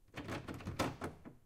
Doorknob rattle 1
Insistent testing of a locked doorknob recorded in studio (clean recording)
jiggle, handle, rattle, shake, test, trapped, locked, doorknob